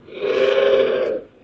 Hi Billy Mays!! here for the incredible OxiMonster, you get 2 for price of nothing from 1 file its amazing! Pitch it up and you've got an Indian House Crow Call in seconds.